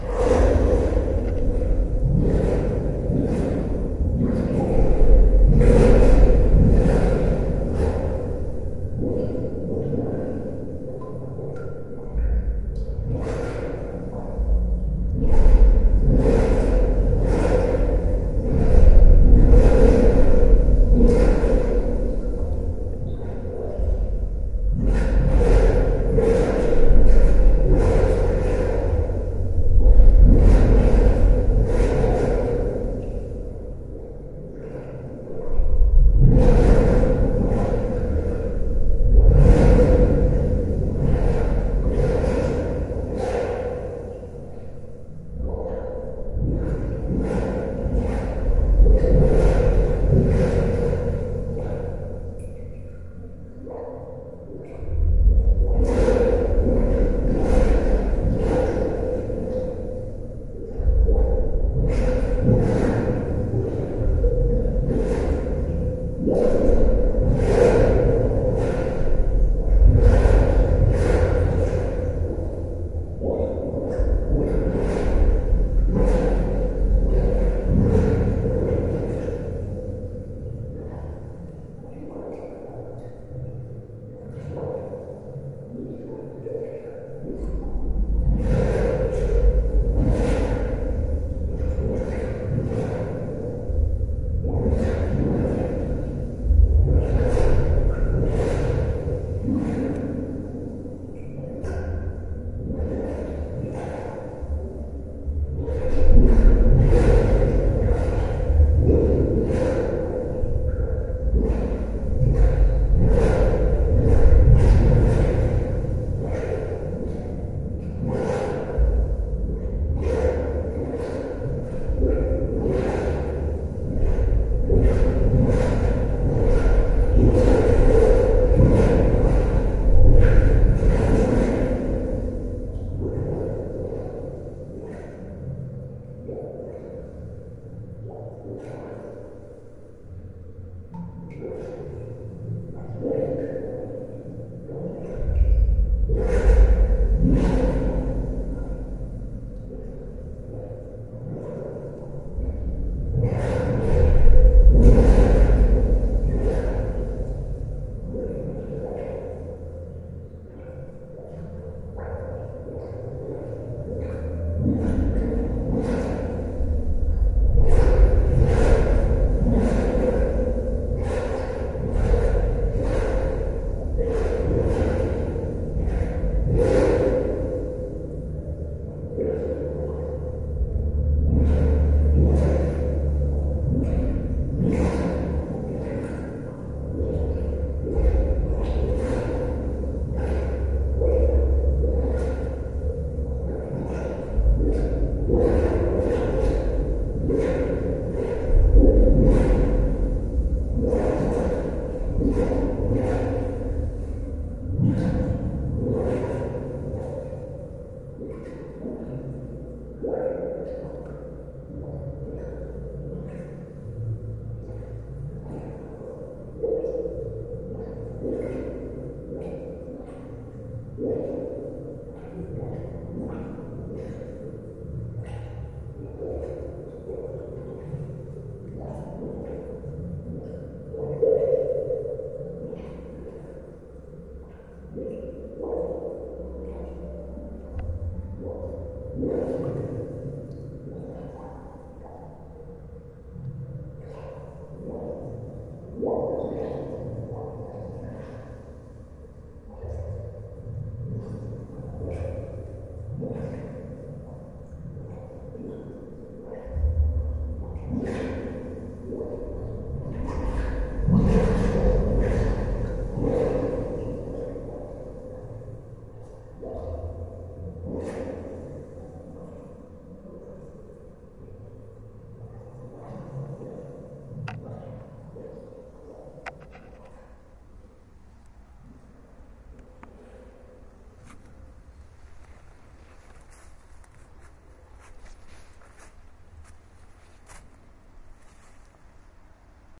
Water Running Underground
A underground concrete water reservoir recorded from the inside using a Zoom H4.